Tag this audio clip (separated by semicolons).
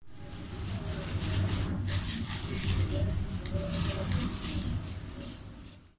Natural; Wind; Wisper